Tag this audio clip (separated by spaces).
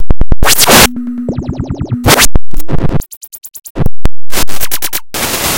drums electonic glitch noise processed waldorf